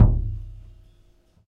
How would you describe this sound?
One mic was on-axis and the other was off. The samples are in stereo only as to allow for more control in tone and editing.
bd, kick, drum, bass-drum, deep